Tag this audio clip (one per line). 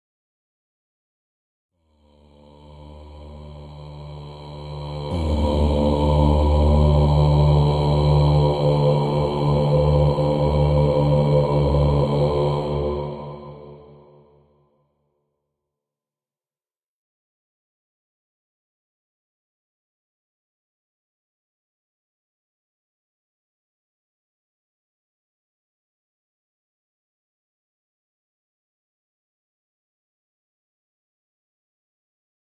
processed
arh
bass
contra-bass
d
vocal
choir
male-voice